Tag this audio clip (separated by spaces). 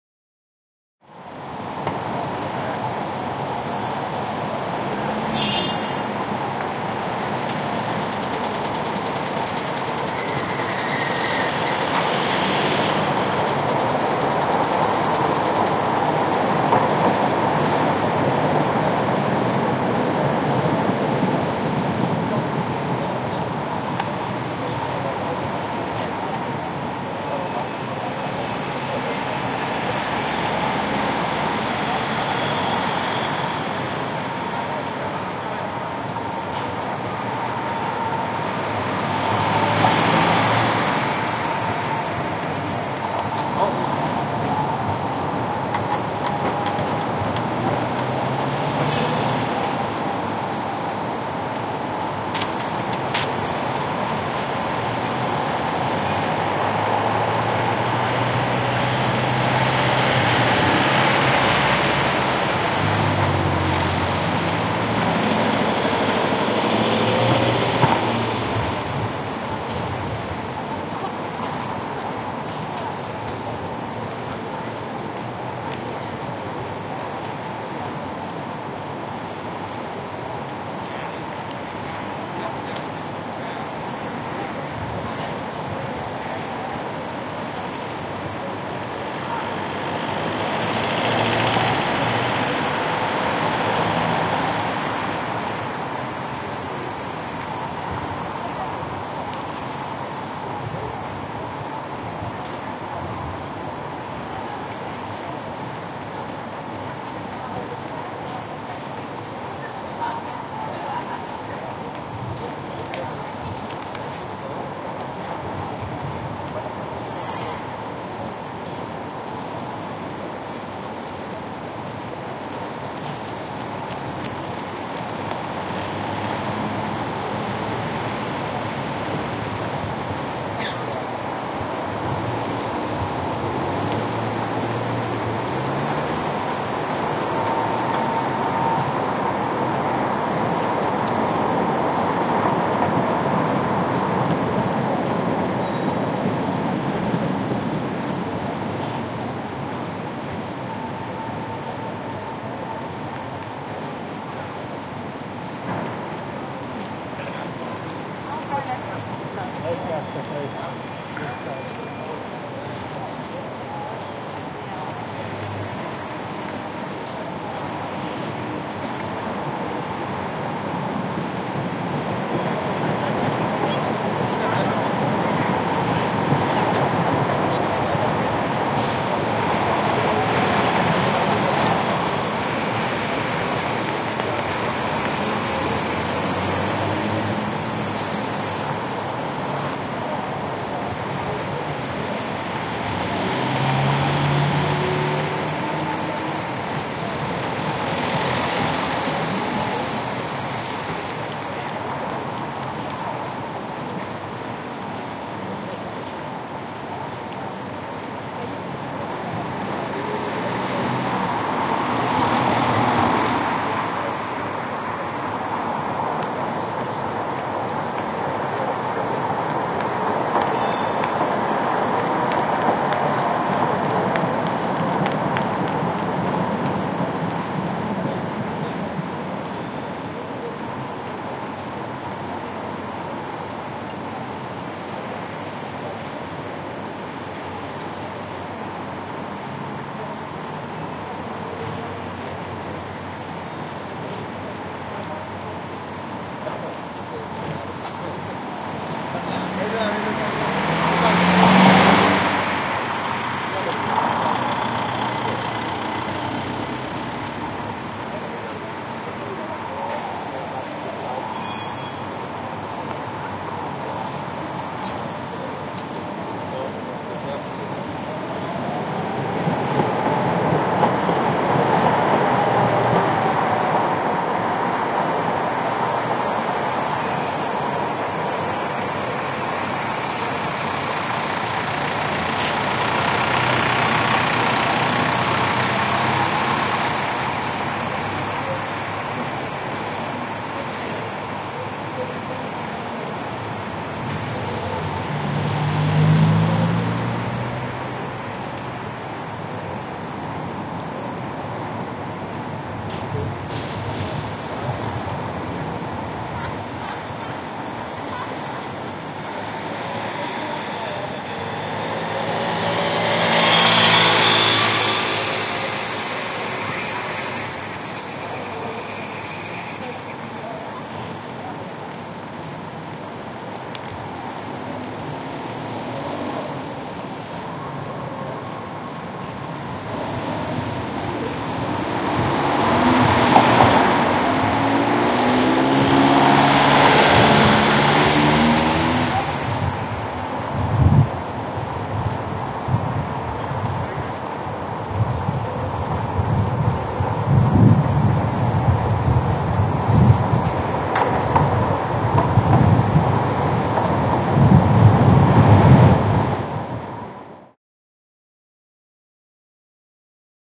czech europe july prague square